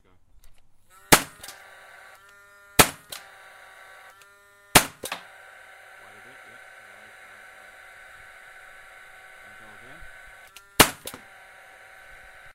industrial nailgun 4shots slow +idle
nailgun firing 4 shots into open space, slowly and with motor idle between.